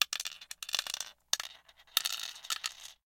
Dropping single glass mancala pieces into the cups of the board.